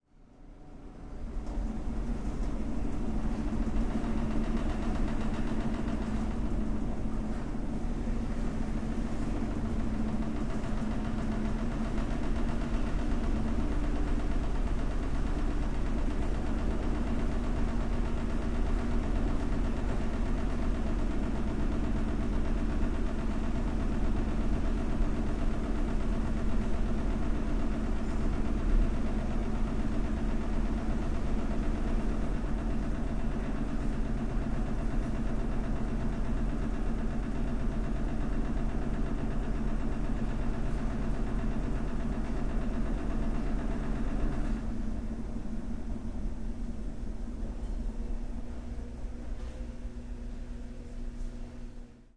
Laundromat Ambience with Machine Spin Cycle 0080
Laundromat ambience with washing machine in spin cycle.
interior, washing-machine, spin, laundromat, laundry, ambience